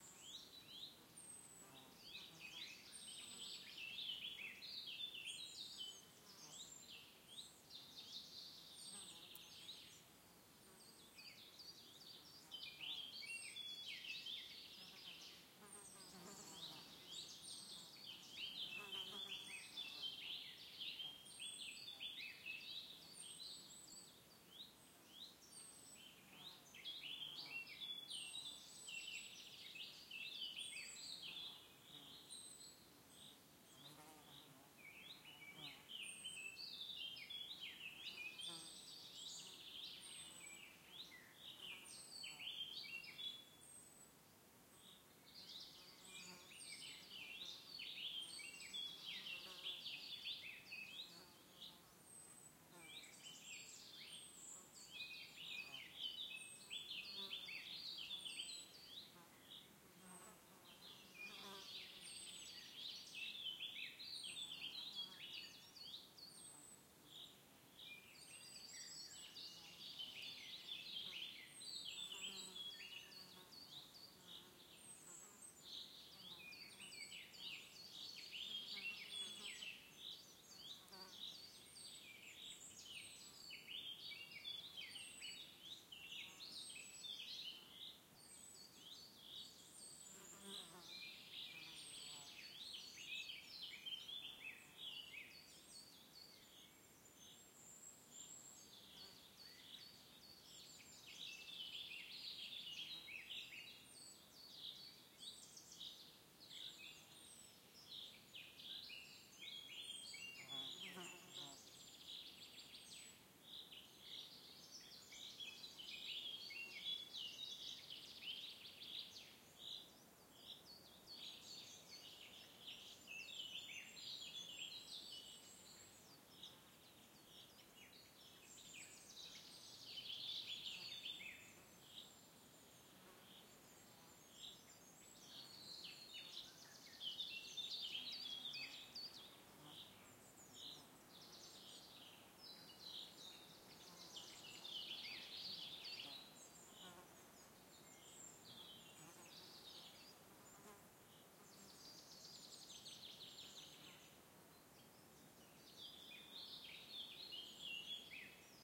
Forest Soundscape (Thuringian Forest)
This soundscape was recorded on monday the ninth of june 2014 at the 'Lange Bahn' near Suhl (Thuringia, Germany). It was a characteristic summer day with 32°C and not much wind. There are mostly birdsongs to hear but also insects at flyby.
It was recorded with Zoom H6 and it's XY microphone and Sound Forge Pro was used for slight editing.
ambiance, ambience, ambient, atmos, atmosphere, birds, bird-song, birdsong, field-recording, forest, insects, nature, soundscape, summer, thuringian-forest, woods